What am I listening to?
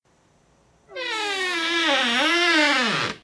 Door creak 004
a door creaking while closing.
creak, creepy, door, horror, sound-effects, sound-fx, spooky